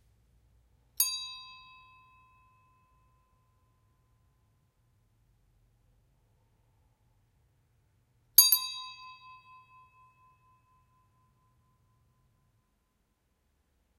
Bell ringing
A small bell being rung. Unfortunately I had very little time with this object so I only managed to record 2 acceptable rings. Hopefully they're of use to someone anyway.